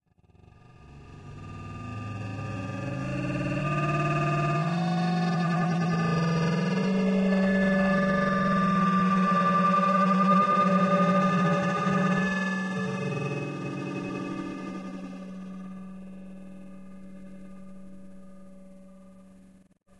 Washing a pyrex baking dish in soapy water, emphasizing the resonant qualities of fingers against wet glass. Recorded with a Zoom H2 in my kitchen. The recordings in this sound pack with X in the title were edited and processed to enhance their abstract qualities.
percussion, baking-dish, glass